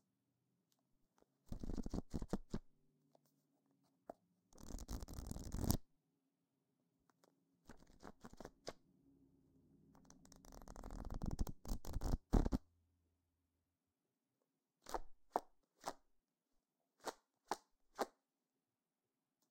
Index Card Flip Manipulation
Flipping through index cards. This is the manipulated file.
Index, Card, Flip